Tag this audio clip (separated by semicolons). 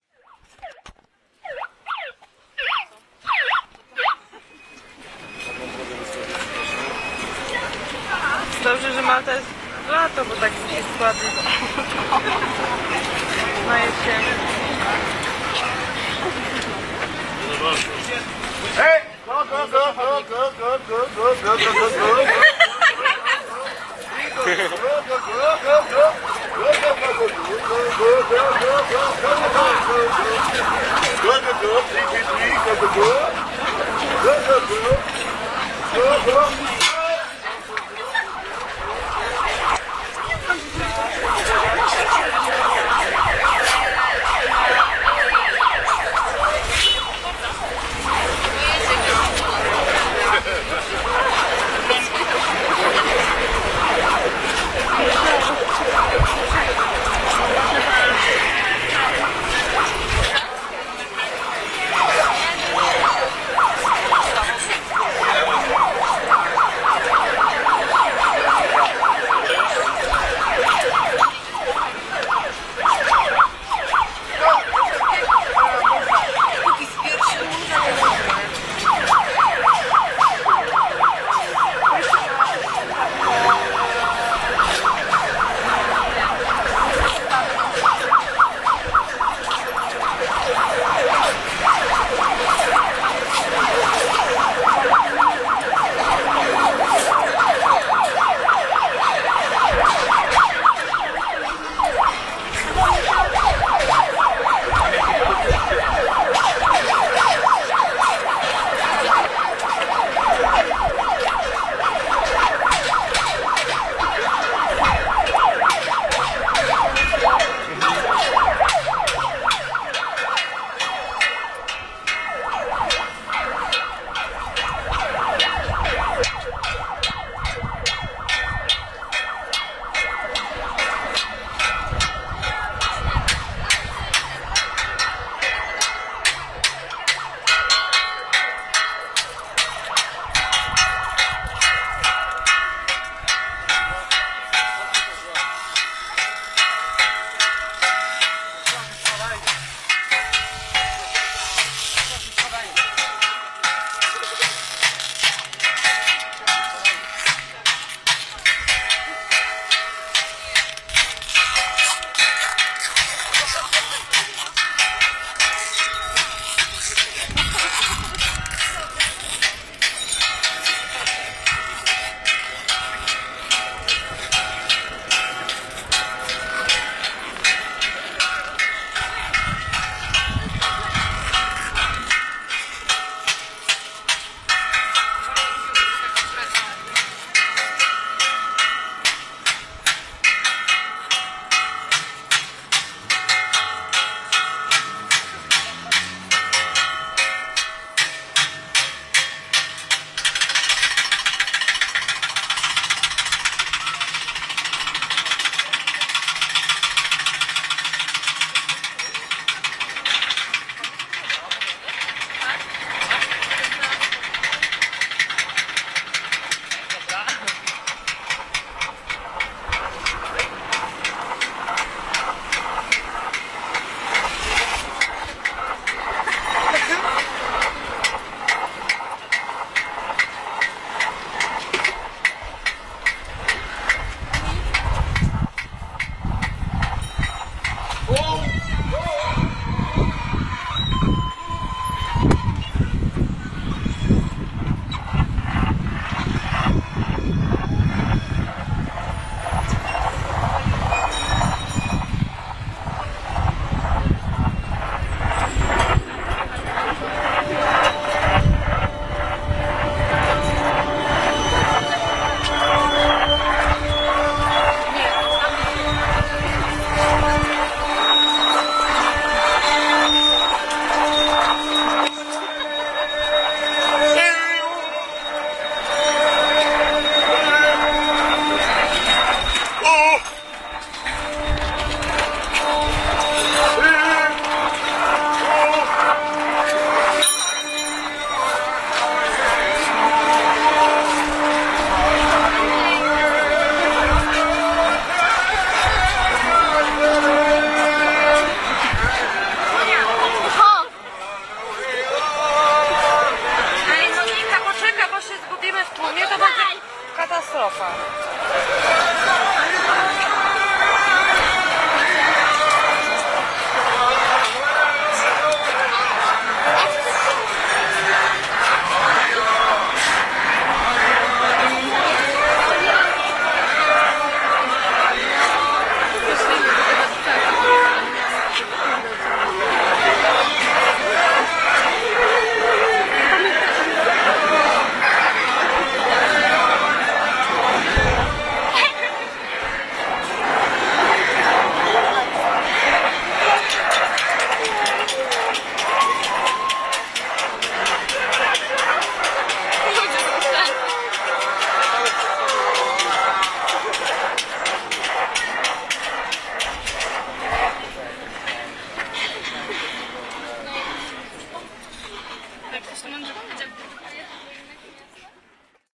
crowd; festival; jeanne; malta; performance; poland; poznan; simone; street; theatre